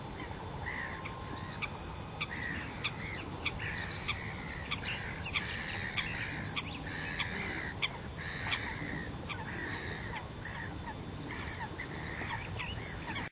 Waterfowl and other birds at Tiengemeten
Waterfowl and other birds calling and singing on the isle of Tiengemeten, an island given back to nature in the Dutch province of South-Holland
bird-song, spring, waterfowl, birds, field-recording